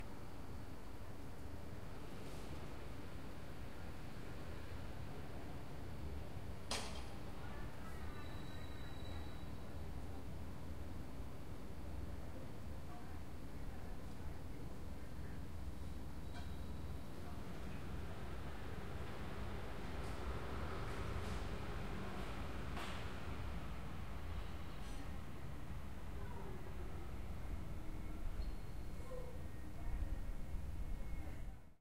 CITY AMBIENCE FROM BUILDING BARCELONA CITY 01
Recorded from my window with a TASCAM DR 40